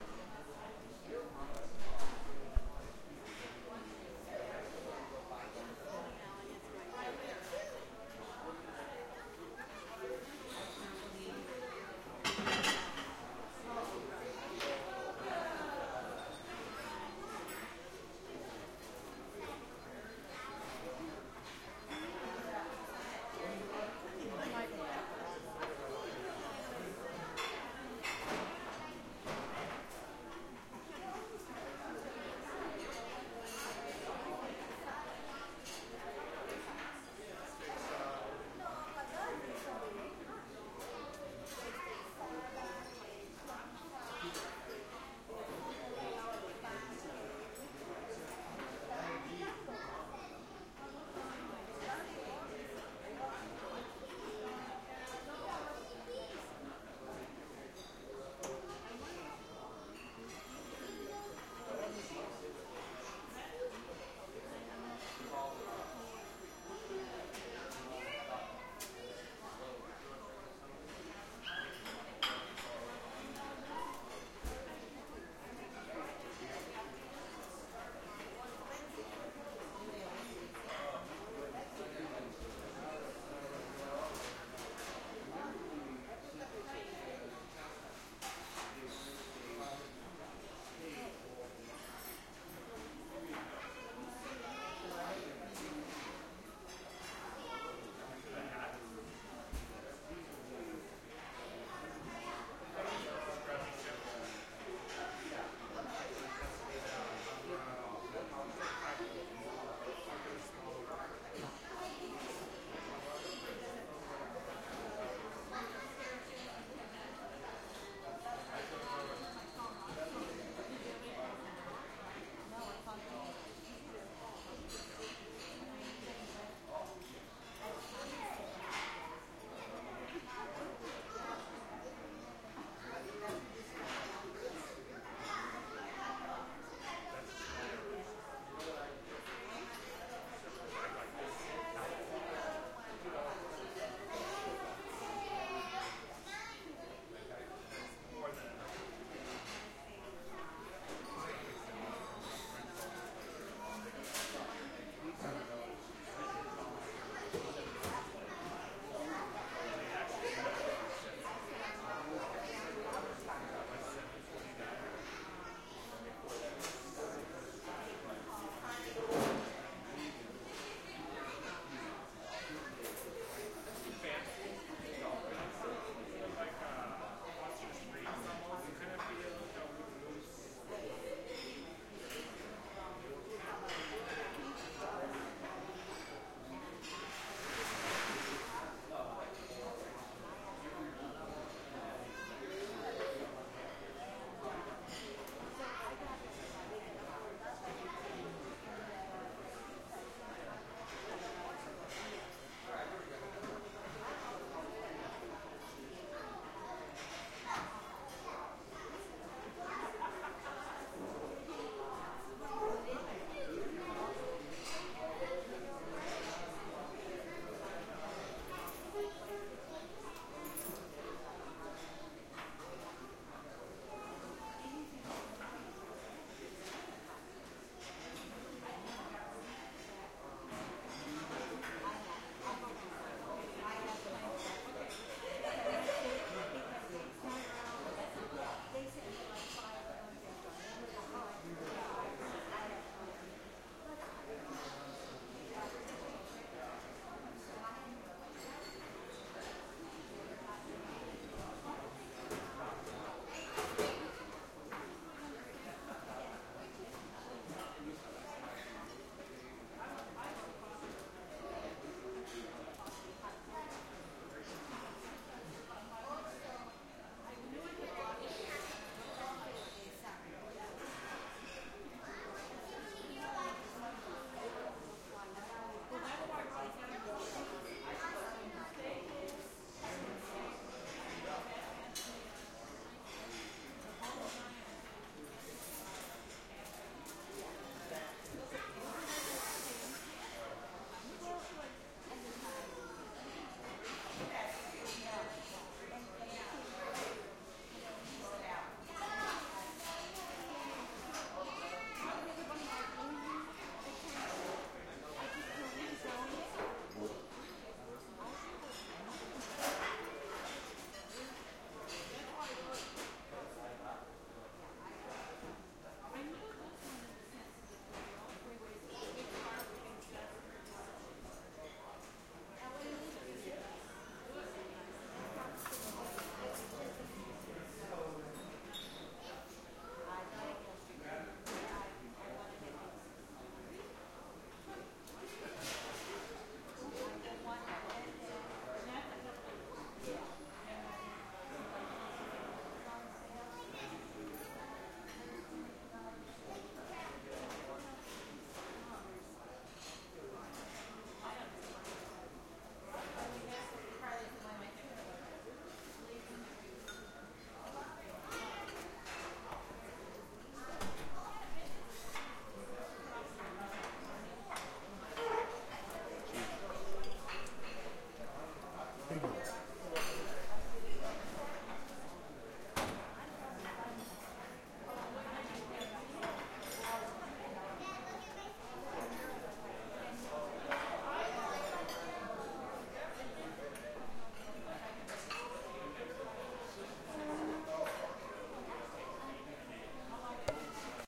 Six minutes of ambient sound from my breakfast at Jerry's Deli 1/05/13. Peace!
breakfast, dishes, Jerrys-Deli, children, silverware, restaurant, Los-Angeles, glasses-clinking, California, field-recording